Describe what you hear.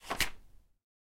Page Turn 19
26/36 of Various Book manipulations... Page turns, Book closes, Page